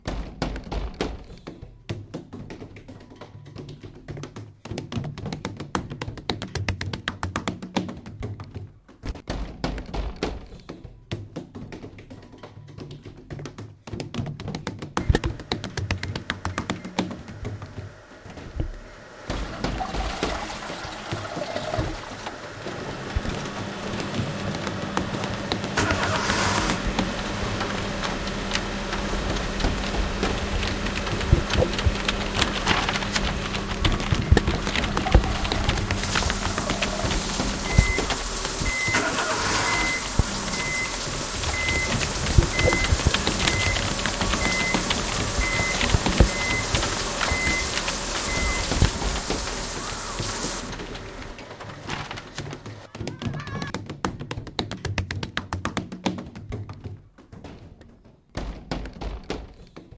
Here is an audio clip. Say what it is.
TCR sonicpostcard-jules,clément
France, Sonicpostcards, Pac